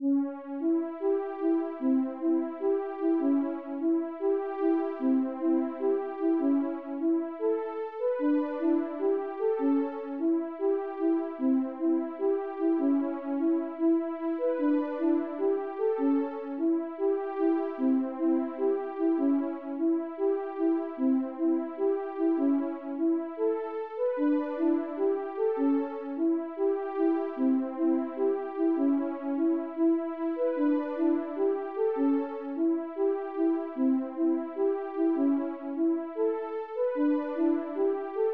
Pixel Cave Echo Melody Loop
Useful in 2d pixel game cave echo environment.
Thank you for the effort.